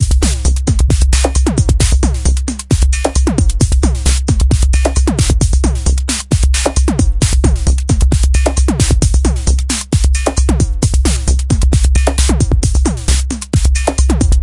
133 bpm ATTACK LOOP 04 electrified analog kit variation 05 mastered 16 bit
This is loop 5 in a series of 16 variations. The style is pure electro.
The pitch of the melodic sounds is C. Created with the Waldorf Attack VSTi within Cubase SX. I used the Analog kit 2 preset to create this 133 bpm loop. It lasts 8 measures in 4/4. Mastered using Elemental and TC plugins within Wavelab.
133bpm, electro